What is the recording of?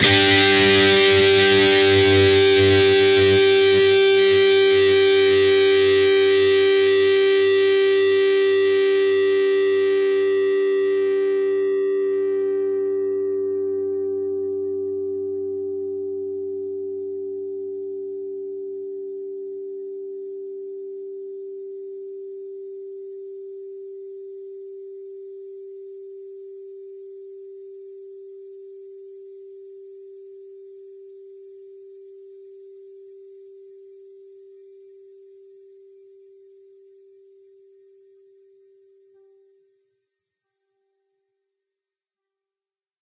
Dist Chr D&G strs 12th up
Fretted 12th fret on both the D (4th) string and the G (3rd) string. Up strum.
chords, distorted, distorted-guitar, distortion, guitar, guitar-chords, lead, lead-guitar